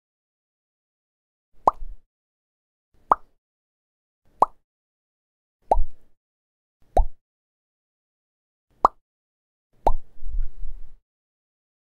for cartoon , good Recording and Editing:)